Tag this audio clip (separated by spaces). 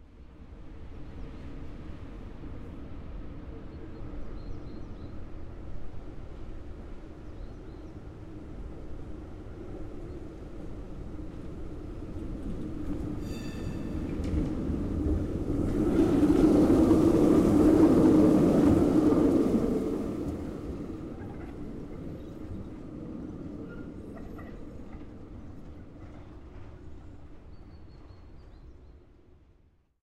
city traffic tram